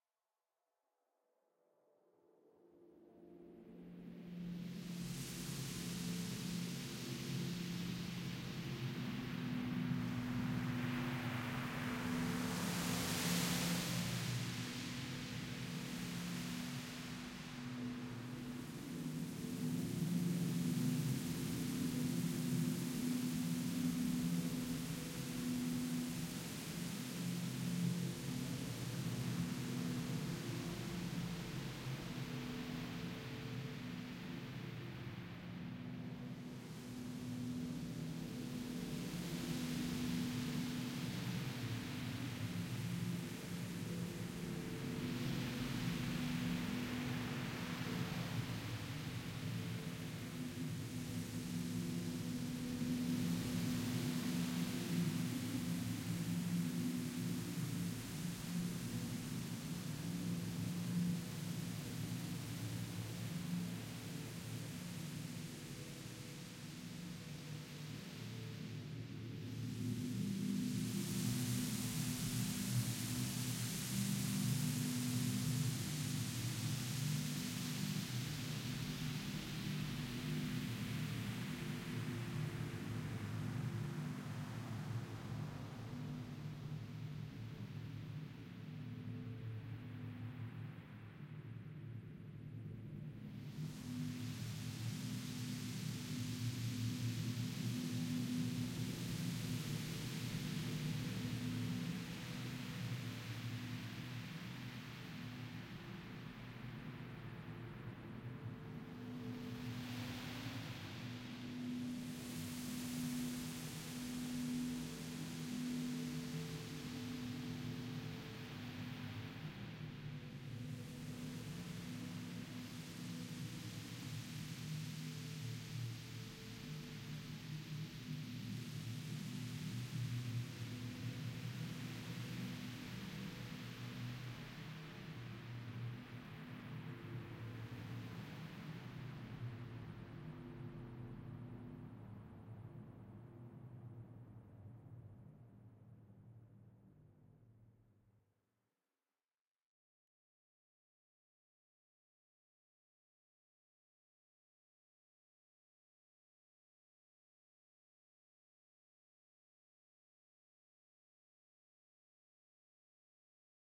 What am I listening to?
drone airy huming
this is part of a drone pack i am making specifically to upload onto free sound, the drones in this pack will be ominous in nature, hope you guys enjoy and dont forget to rate so i know what to make more of
Processed, humming, Drone, ominous